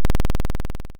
Used in my game "Spastic Polar Bear Anime Revenge"
Was synthesized in Audacity.
videogame, 8bit